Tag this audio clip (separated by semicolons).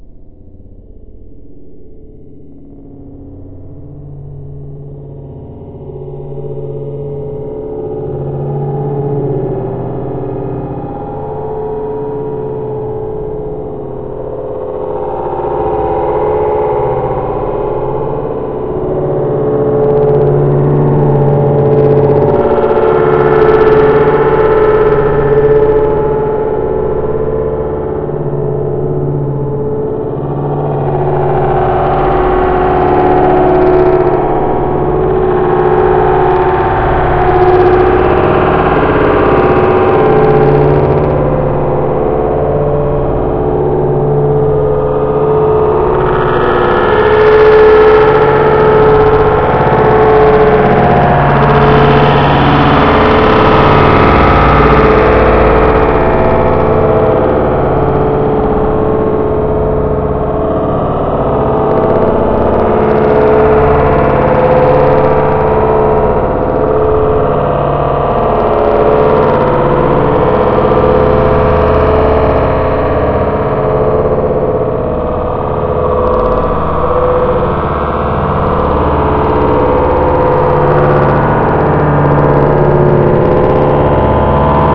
film-soundtrack; horror; strange; stress